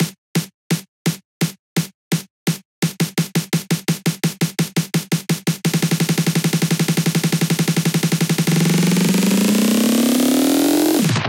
Snare Roll Pitch
Drums, Snare, Snare-Roll, Hardstylez, Electric-Dance-Music, Hardcore, Hard-Dance, Dance, EDM